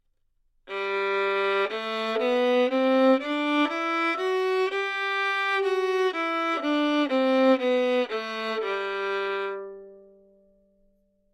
Violin - G major
Part of the Good-sounds dataset of monophonic instrumental sounds.
instrument::violin
note::G
good-sounds-id::6295
mode::major
violin, scale, Gmajor, good-sounds, neumann-U87